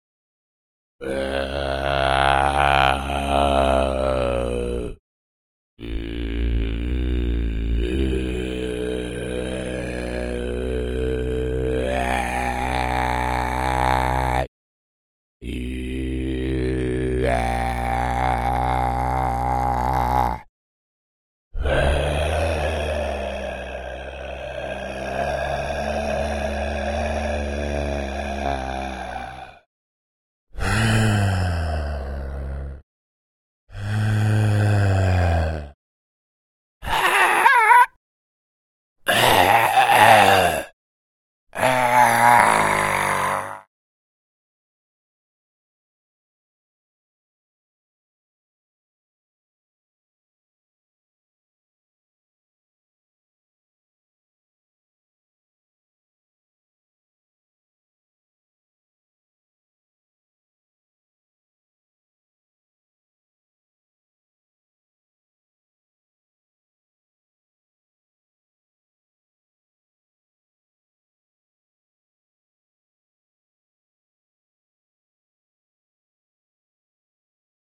vocal groaning male
Low raspy male groans with a few higher pitched screeches/crackles near the end. Some breathy portions are in here as well.
Zoom H4N
sigh, rough